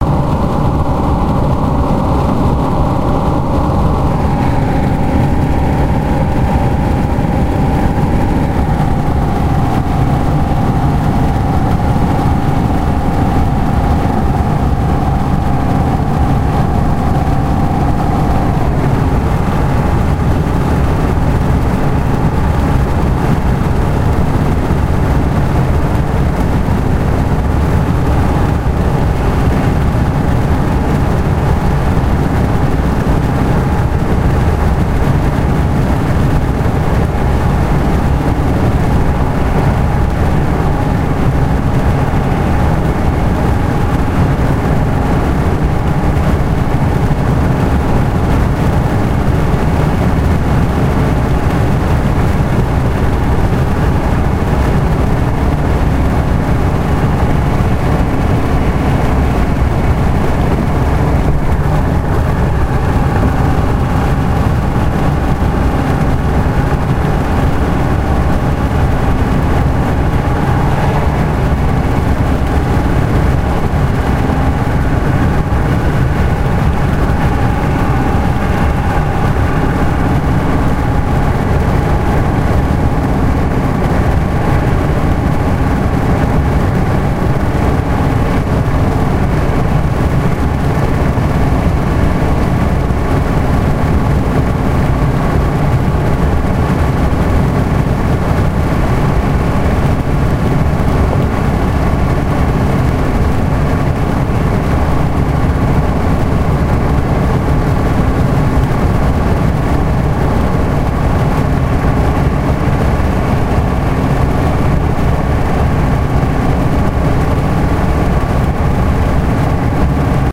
Car Strong Wind Noise
Recorded with a Sony digital voice recorder, model ICDUX560.
blow, blowing, car, gusting, intense, loud, strong, travel, vehicle, wind